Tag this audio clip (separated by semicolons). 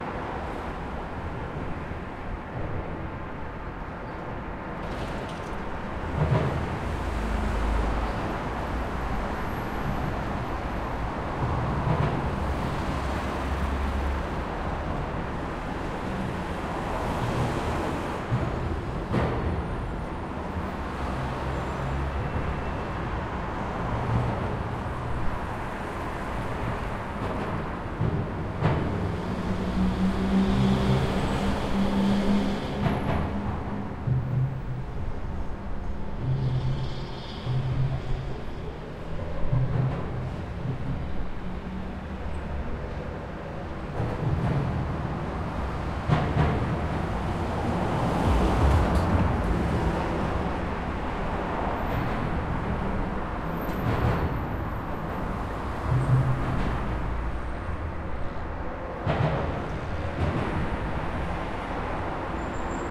2012; Omsk; Russia; atmo; atmosphere; bridge; cars; noise; road; rumble